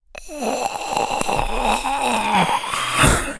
Human DyingBreath 03
A clean human voice sound effect useful for all kind of characters in all kind of games.